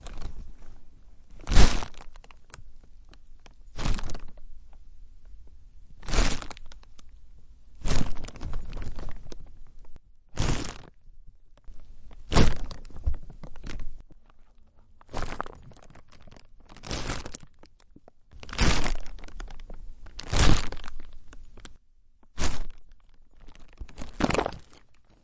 Plastic Bag Crunch
Percussive scrunching of a plastic bag. Stereo Tascam DR-05
asmr, bag, crinkle, crumple, crush, plastic, plastic-bag, stereo